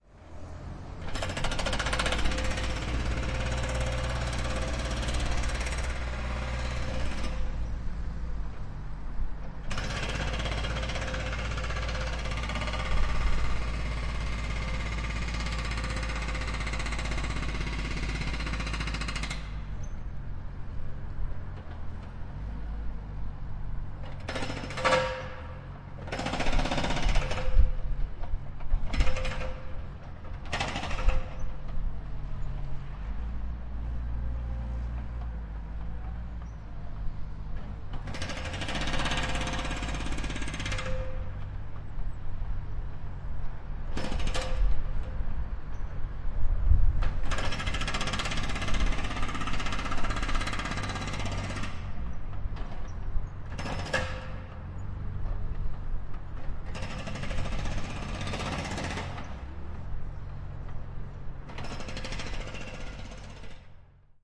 Construction Site HQ
Construction Site. Recorded using Sony PCM D100
build, builders, building, constructing, construction, construction-site, demolish, demolition, drill, drilling, hammer, hammering, industrial, noise, work, worker, workers